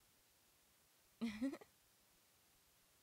lapiz cae fuerte